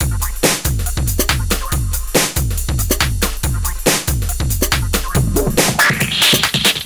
Hard aggressive drum-n-bass style beat. Has a fill in the fourth bar.
140bpm, beat, break, breakbeat, distorted, drum, hard, loop, synth